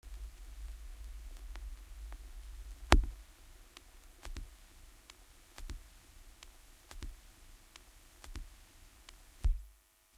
Vinyl Runout Groove 03
Run out groove of a 7" Single @ 45 RPM.
Recording Chain:
Pro-Ject Primary turntable with an Ortofon OM 5E cartridge
→ Onkyo stereo amplifier
→ Behringer UCA202 audio interface
→ Laptop using Audacity
Notched out some motor noise and selectively eliminated or lessened some other noises for aesthetic reasons.
45RPM, 7, analogue, crackle, hiss, noise, record, record-player, retro, run-out-groove, single, stylus, surface-noise, turntable, vintage, vinyl